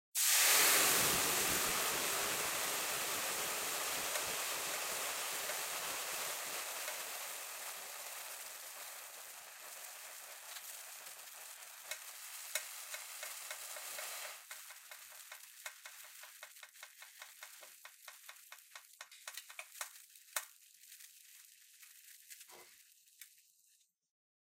Intense Sizzling 3
The sound of water being poured onto a pre-heated frying pan, creating a intense sizzling noise.
Recorded using the Zoom H6 XY module.
boiling, bubbling, burning, fizzing, heat, hissing, hot, liquid, sizzling, water